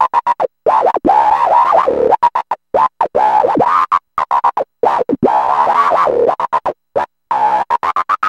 Nord Bass 2
Nord Lead 2 as requested. Basslines are Dirty and Clean and So are the Low Tone rhythms.
acid, synthesizer, ambient, glitch, idm, rythm, bassline, backdrop, soundscape, melody, nord, electro, background